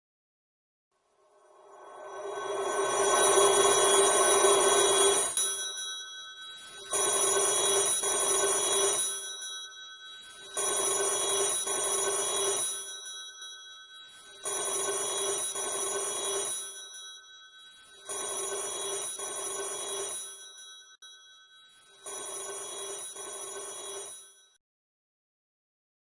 To match a favorite mood I just got a classic phone sound reverbed it forwards and backwards faded out etc etc